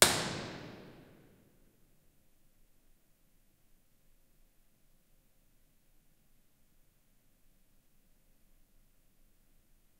Impulse response generated from field recordings of a parking garage. Recorded using an Audio Technica BP4029 M/S shotgun microphone into a Zoom H4n recorder. This recording is part of a pack of impulse responses comparing different recording and post-processing techniques.
Sweep recordings were deconvolved using Voxengo Deconvolver.
Sound Design, Music Composition, and Audio Integration for interactive media. Based in Canberra, Australia.